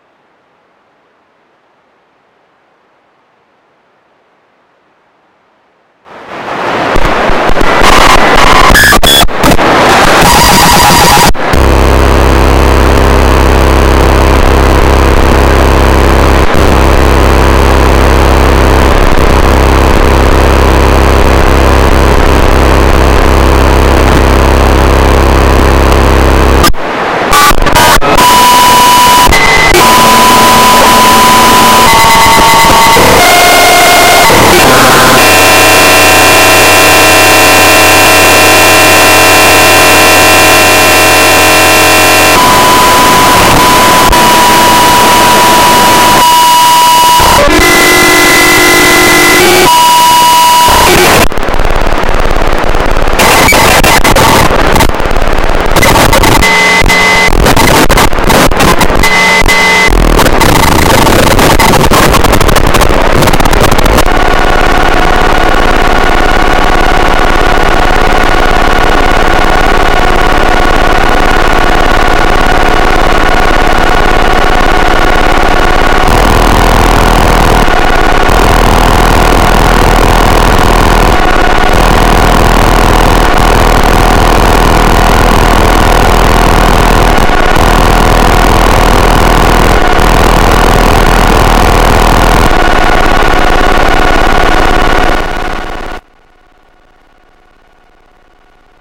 I have refined the 'listening' to computer internal data transfer. A Sony Pocket World Radio was wrapped in aluminum foils. A short wire was attached to the radio's MW circuitry and led out through the isolated radio. The short wire was then provided with a small coil, that was place on top of a buffer chip close to the CPU. What you hear now is the starting up Win NT on old Compaq.
starting, command, windows, chat, packages, programs